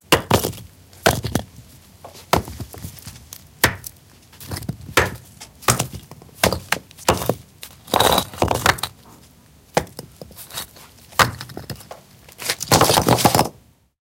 Feild-recording, Wind, Water, Fire, Earth.
Feild-recording, Fire